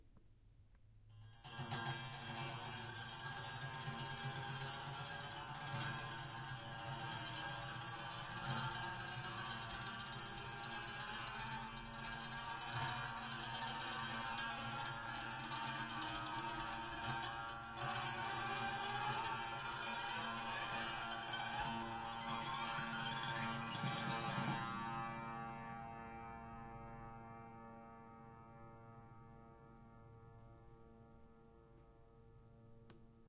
Experimenting with the inner life of a prepared piano, recorded with a Tascam DR07x